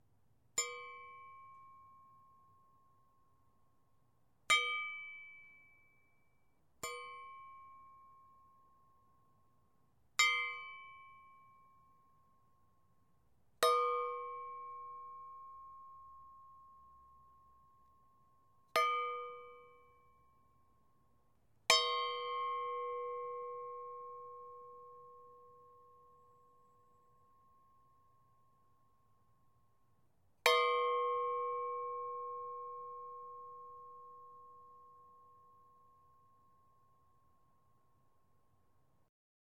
Metal Clang 01
Aluminum angle rafter square suspended in air being flicked by finger nail so it rings.
Rode M3 > Marantz PMD661.
aluminum, clang, clank, ding, hit, metal, ping, resonance, ringing, sustained, ting